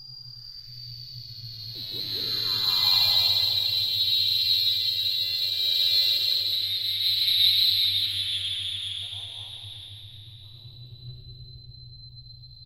sci fi 2
A strange spacy sci-fi sort of sound - part of my Strange and Sci-fi 2 pack which aims to provide sounds for use as backgrounds to music, film, animation, or even games.